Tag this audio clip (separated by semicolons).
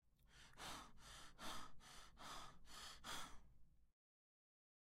Breath; Foley; Voice